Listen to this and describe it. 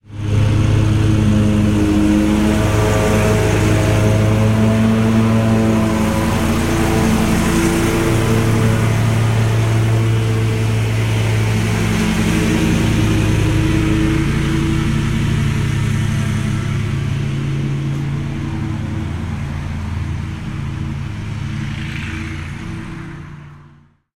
This is a recording of a big lawnmower. It was done on an iPhone.